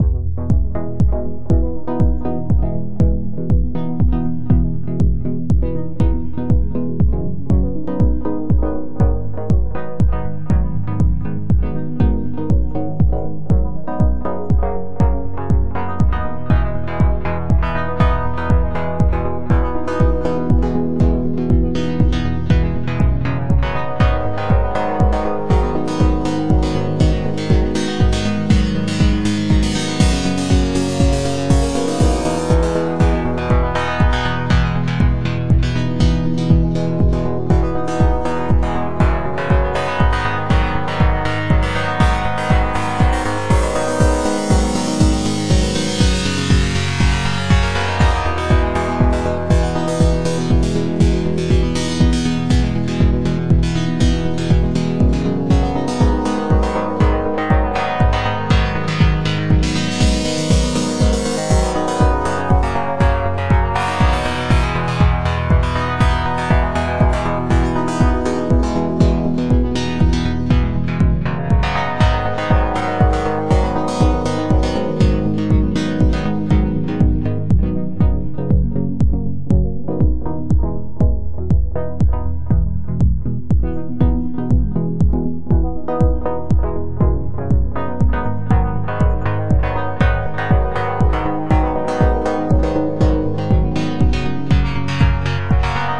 kick and Progressive leads.
Synth:Ableton live,Silenth1.
ambient, bass, beat, club, dance, drum, electro, electronic, hard, house, kick, kickdrum, leads, loop, melody, original, panning, progression, Progressive, rave, sound, subs, synth, techno, trance